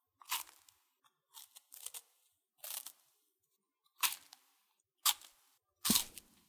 Chomp on Chip
Chomping on a chip puff.
bite, biting, chew, chewing, chip, chomp, chomping, crunch, crunching, eat, eating, food, munch, munching, ships